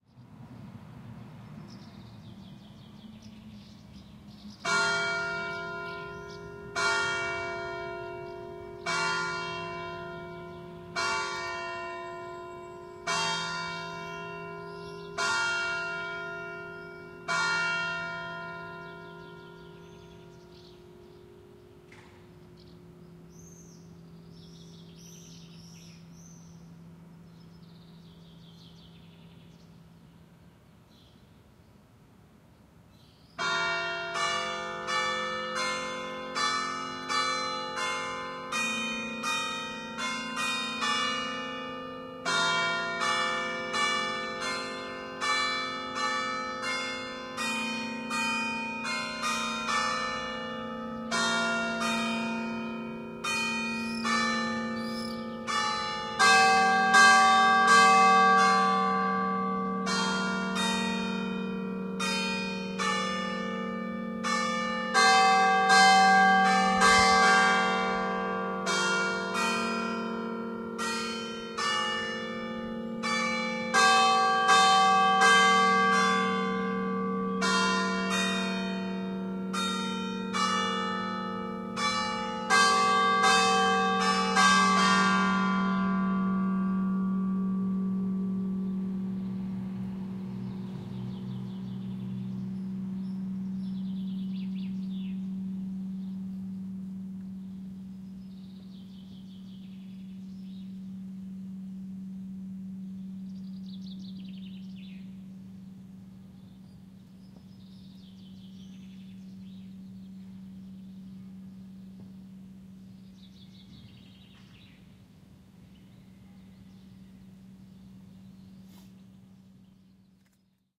civenna bell
Not too far from Lake Como, Italy, in a village called Civenna, just south of Bellagio, a small church has a bell tower that chimes the hour. The bell chimes 7:00 am, as the town wakes up and the birds chirp. Recorded using a Zoom H4 on 5 July 2012 in Civenna, Italy. High-pass filter.
village, morning, como, mountain, civenna, bell, italy, town, church, bellagio